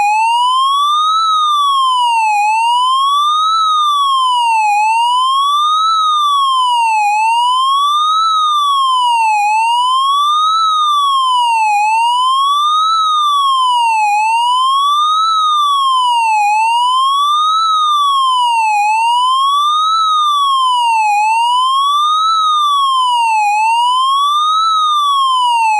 Synthesized high pitch siren.
This is a one of the results of my attempt to complete a school assignment.
We were supposed to create a siren sound with AudioGen synthesizer.
ambulance, police